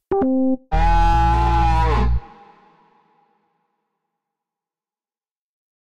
Synthesized version of drone siren from Oblivion (2013) movie.
This is a funny one.
Synth: U-HE Zebra
Processing: none
Sample: cow's lowing
Processing: distortion, time-stretch, pitch correction, reverberation
cow, oblivion, ui, blip, communication, drone, processed, signal